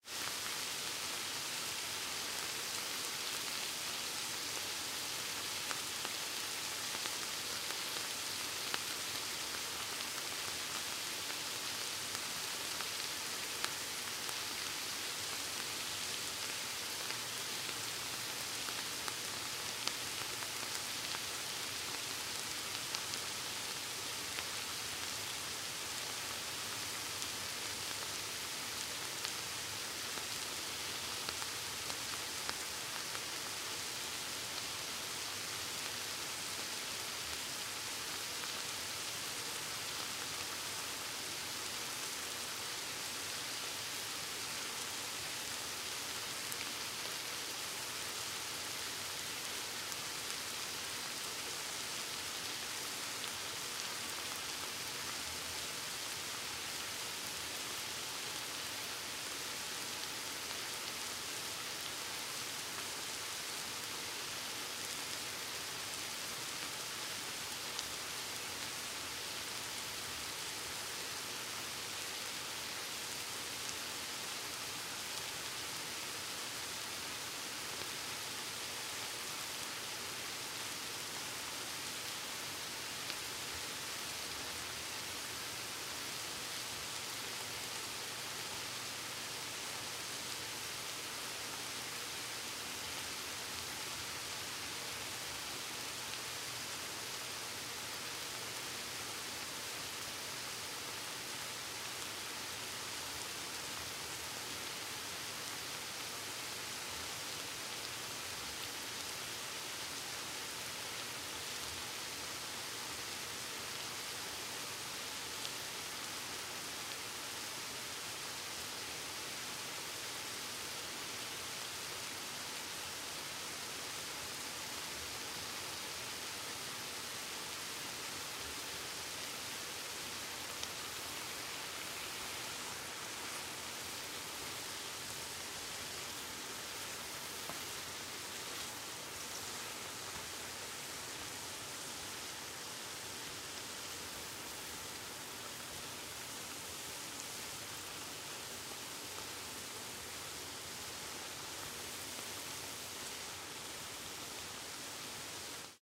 AMB FryingOnions FoleyRainStorm
Sound taken from frying onions in a pan. Foley represents rain. Captured indoors.
Sound Devices MixPre-6 and Sennheiser 416.
rain, foley, weather, storm